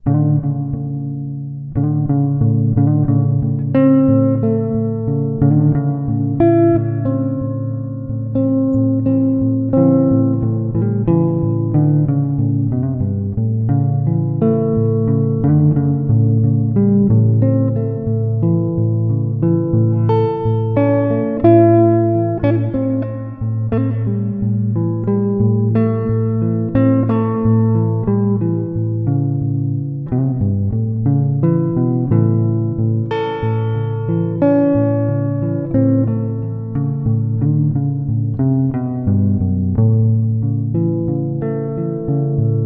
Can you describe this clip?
Gmajor folk 90 bpm
90bpm, folk, guitar